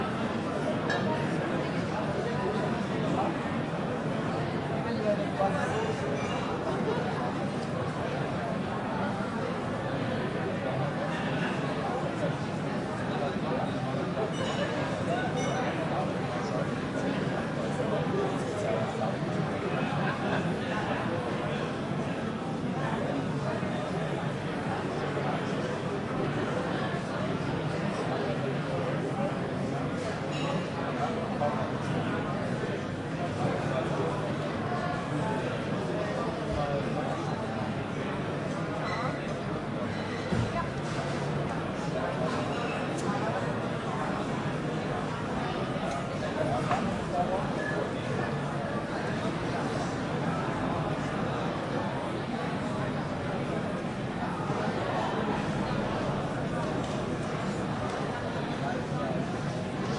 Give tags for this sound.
ambiance; ambience; ambient; atmo; atmos; atmosphere; background; background-sound; field-recording; stereo

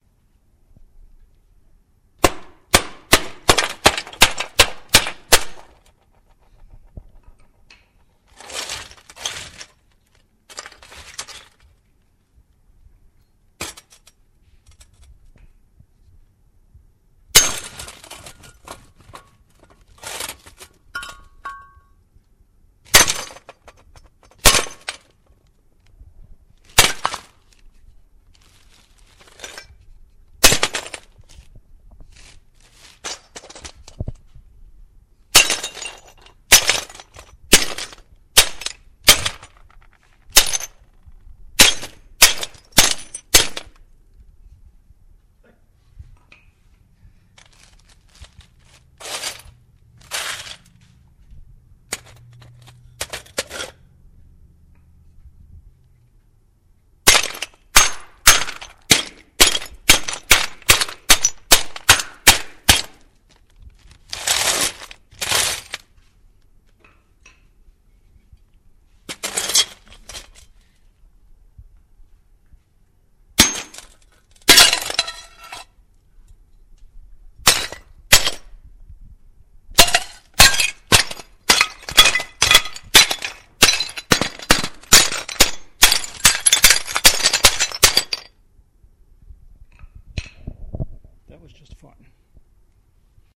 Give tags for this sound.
ceramic; field-recording; smash

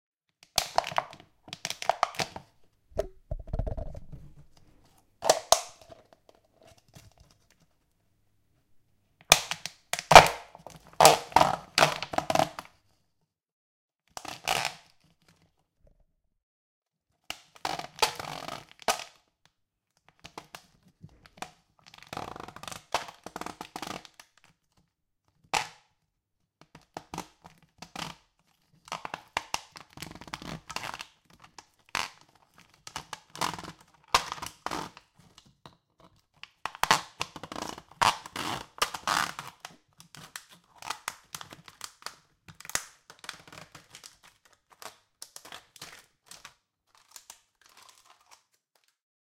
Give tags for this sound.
bottle; knife; soda

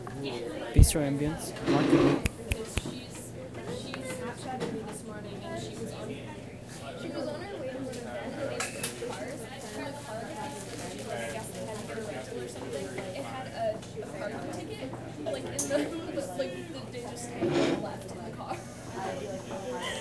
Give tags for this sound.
Bistro; bustling; coffee

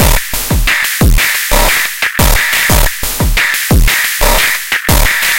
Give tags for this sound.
cheap; drum-loop; drums; drum; beat; teenage; machine; operator; loop; rhythm; pocket; Monday; percussion-loop; engineering; 89bpm; PO-12

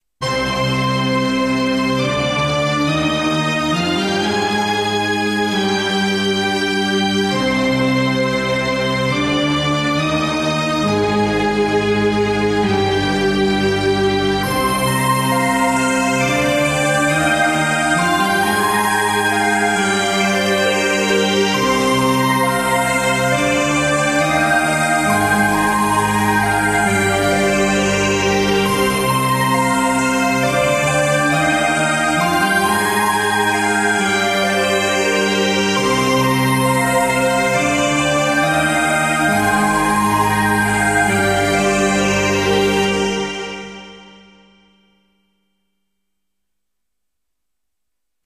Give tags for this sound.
drive; feelgood; inspire

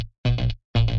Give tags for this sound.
techno; distorted; electronic

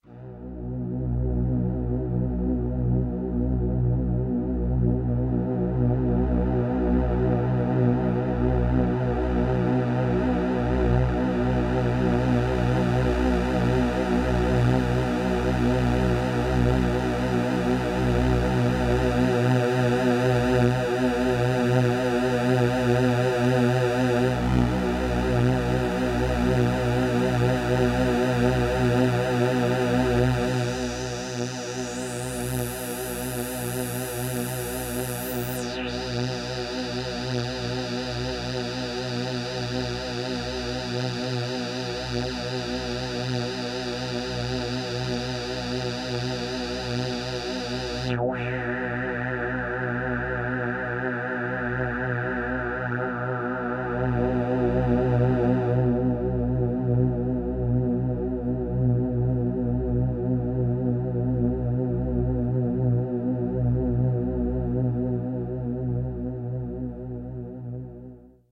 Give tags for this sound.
drone; pad; experimental; ambient; soundscape; space

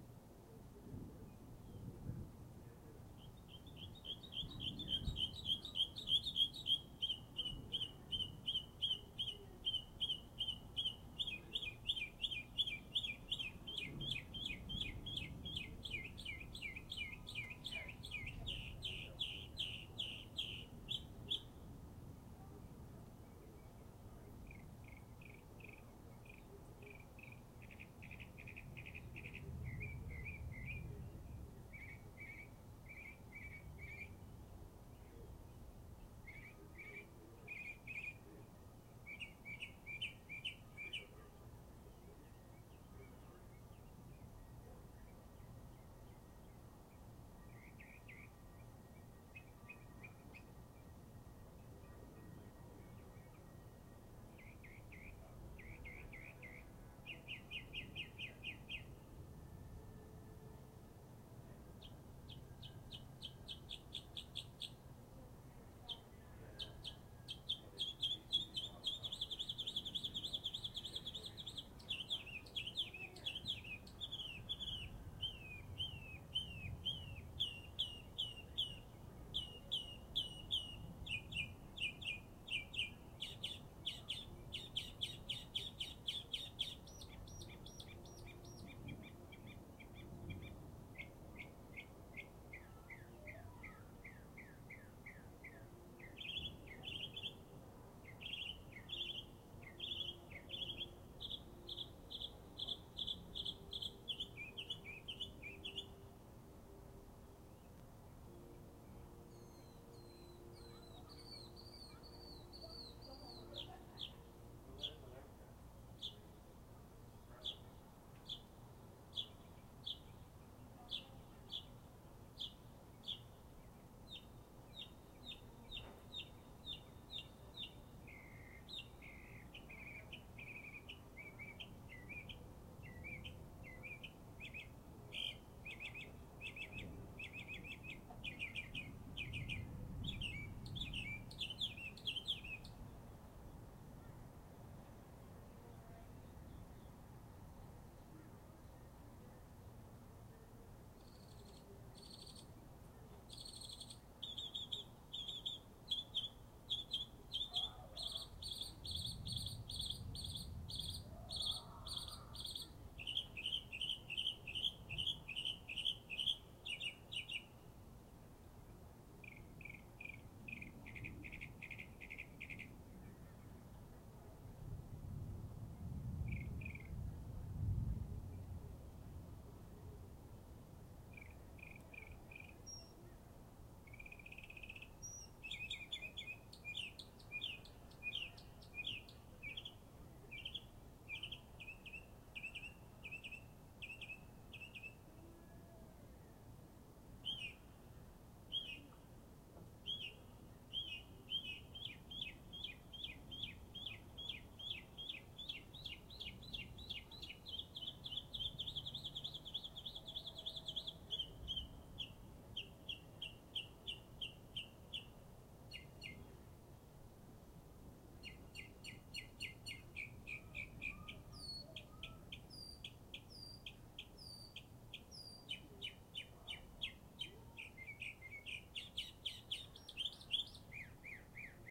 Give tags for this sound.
weather; rain

